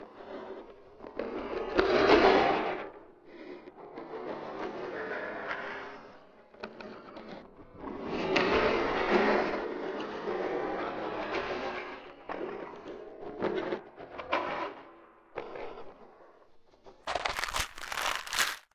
vitamin shaker
bottle of vitamins that i shook and rolled around. manipulated sound outcome